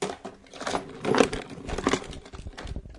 Rummaging through a junk drawer
crash, objects, clatter, chaotic